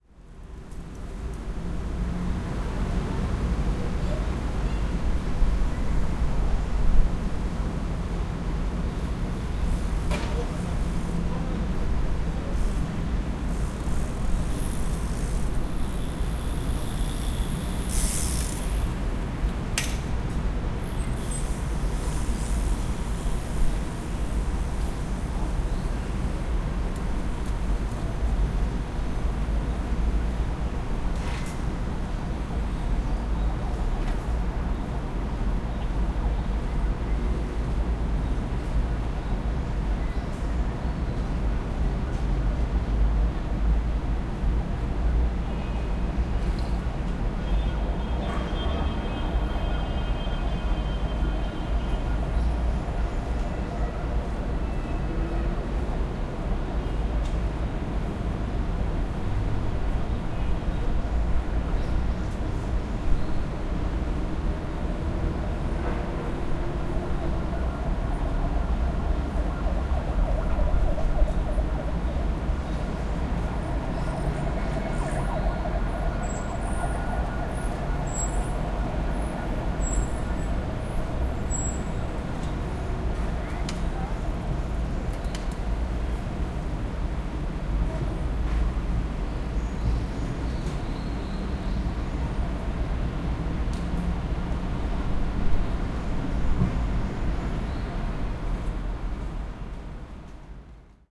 0383 Ambience Olympic Park
Bicycle, ambulance, police, footsteps, voices birds at Olympic Park.
20120723
ambulance, bicycle, birds, field-recording, horn, korea, police, seoul